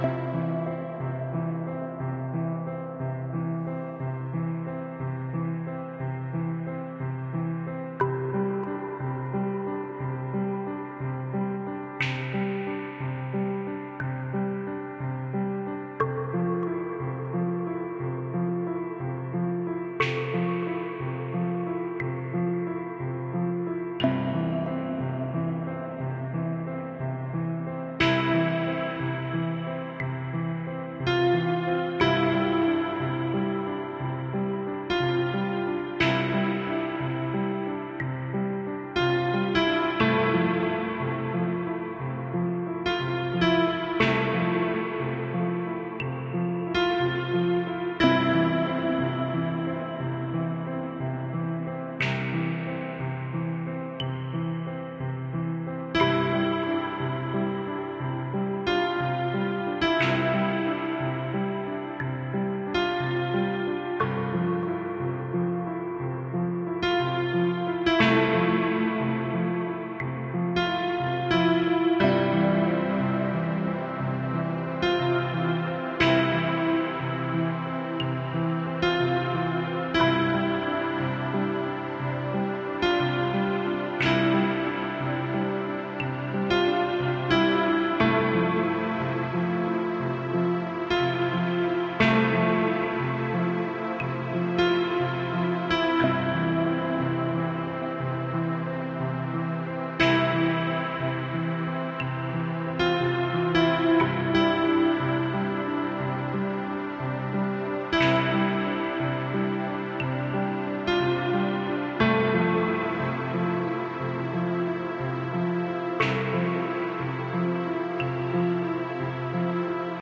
Ambient guitar X1 - Loop mode.
Synths: Ableton live,S3,Kontakt,Yamaha piano.